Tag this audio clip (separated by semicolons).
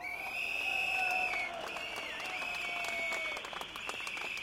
labour; whistle; demonstration